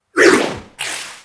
Gagging warped
My original gagging put through a filter that made it sound like some sort Cthullu-esgue monster spewing out of the pit enjoy!
alien, puke, retching